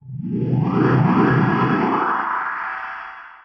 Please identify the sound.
Industrial Wobble
Cut from dubstep song and heavily processed and equalised